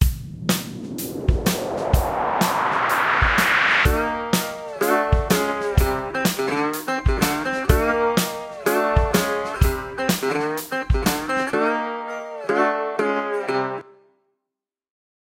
Happy Country Tune
ad, advertisement, commercial